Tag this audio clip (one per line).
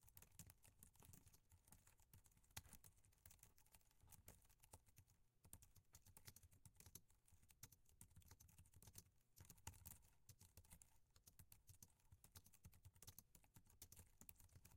typewriter
keyboard
typing
type
computer